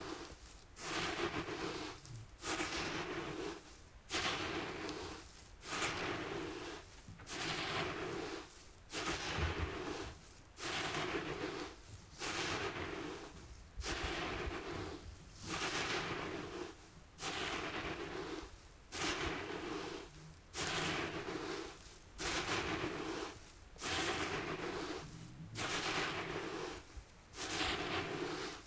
Foot steps on sand FF661
Even tempo footsteps on sand. Low tones, sand grains cracking/sliding. Full sound.
Sand, Walking-on-sand